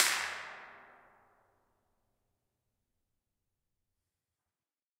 ambix reverb created with a cap gun in a silo recorded in AmbiX on a zoom H3-vr
Reverb, Impulse, B-format, Response, Ambisonic, AmbiX, IR